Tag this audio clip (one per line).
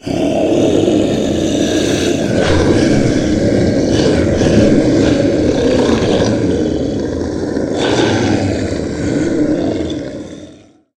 animation
predator
fearful
dragon
grunt
alien
scary
dinosaur
roaring
monster
animal
fantasy
beast
growl
horror